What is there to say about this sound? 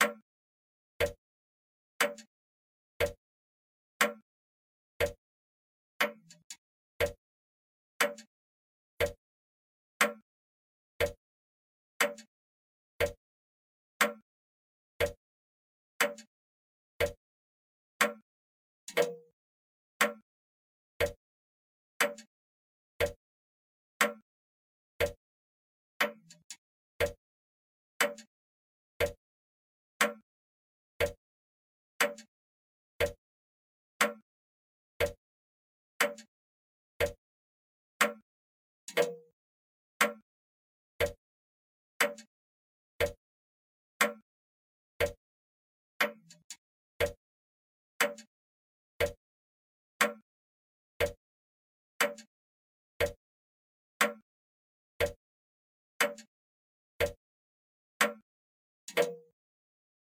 60 seconds of a Grandfather Clock. I needed a clean sample of a Grandfather clock. The result is a Hi-Fi crystal-locked version of the original recording by daveincamas. I particularly like some of the extra sounds from the mechanism. Thanks for the original sample Dave!